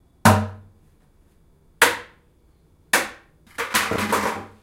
Gallon bucket being hit with a drumstick. Microphone used was a zoom H4n portable recorder in stereo.
city, field-recording